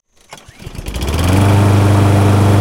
CM Lawnmower Startup 2
The sound of a lawn mower starting up.
up, lawn, grass, mower, cutter, landscaping, outdoor, motor, engine, start